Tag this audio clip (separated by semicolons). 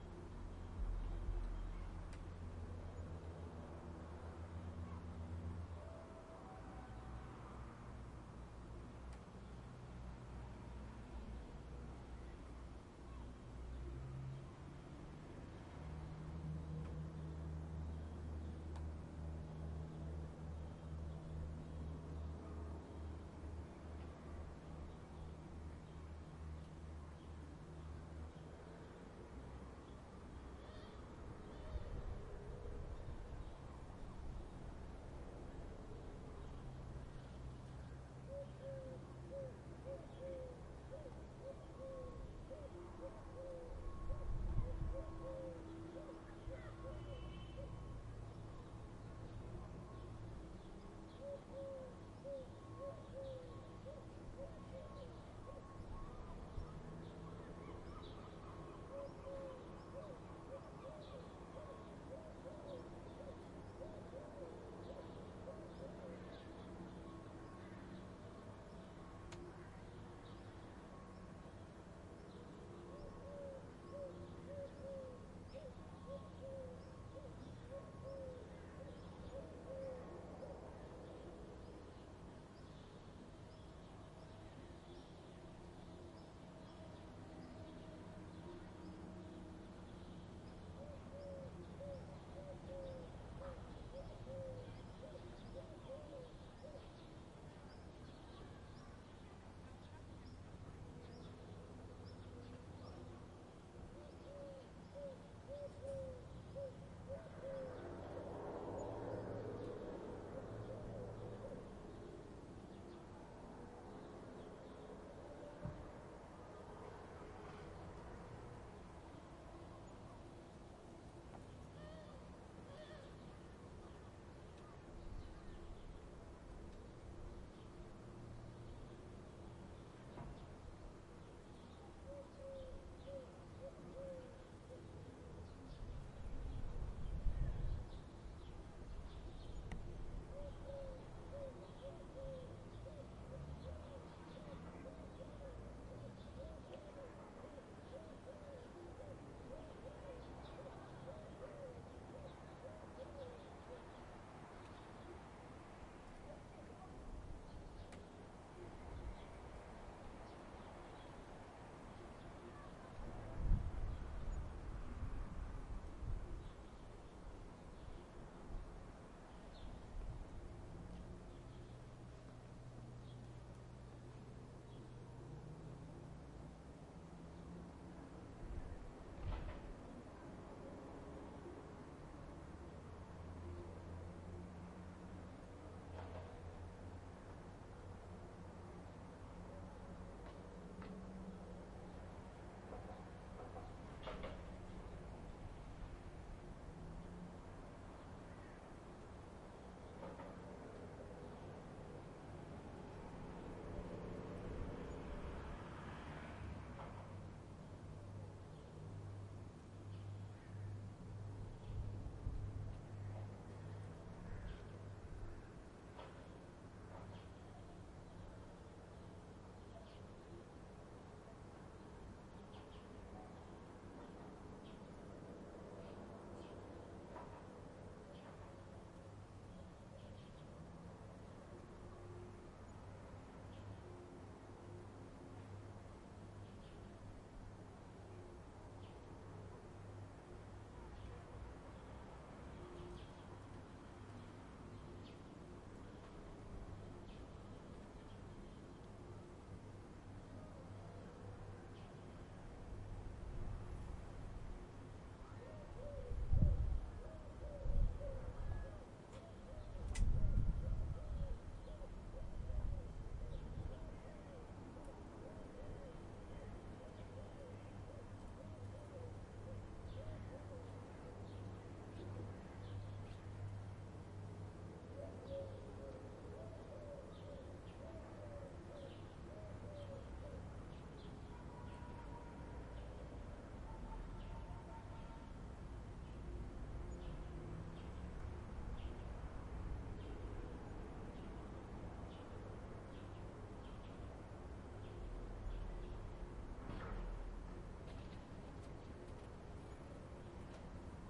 my; wind